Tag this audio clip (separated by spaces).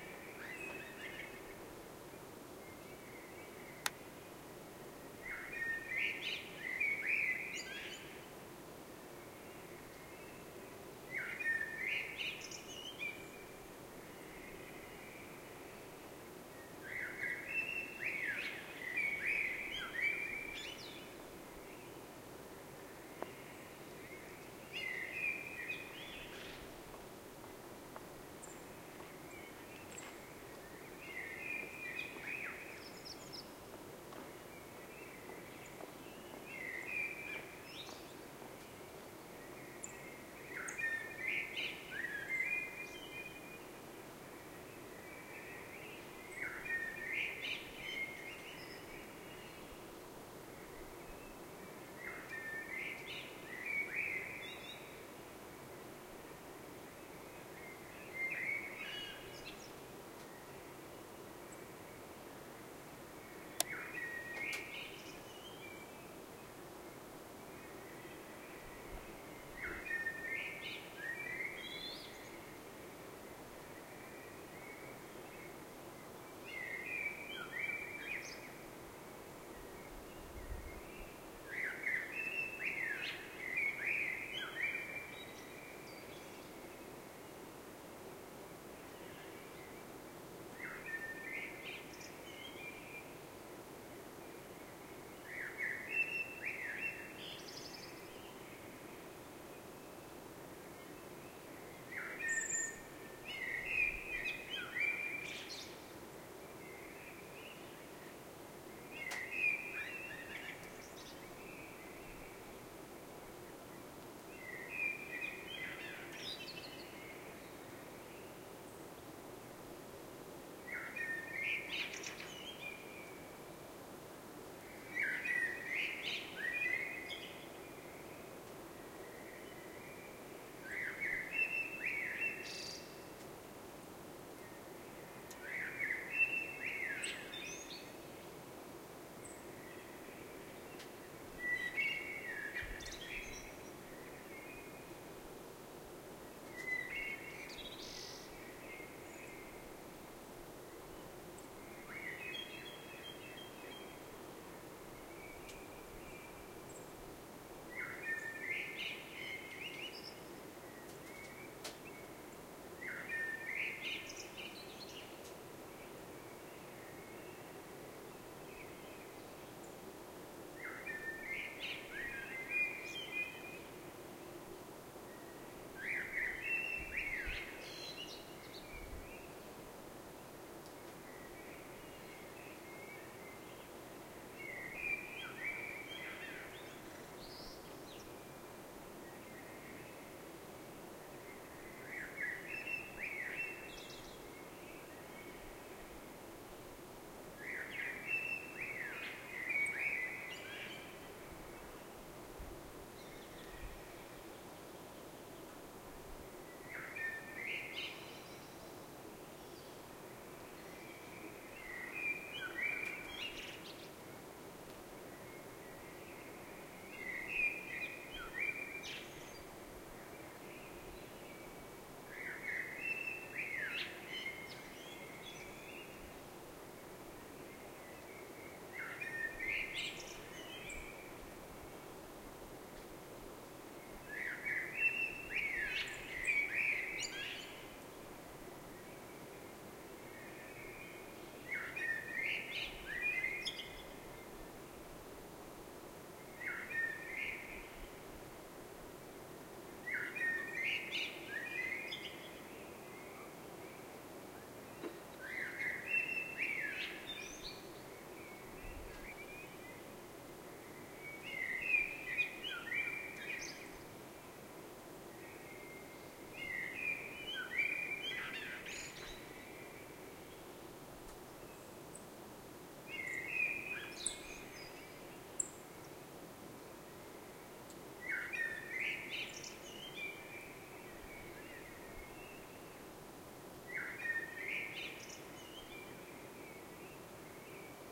birds night bird singing koltrast song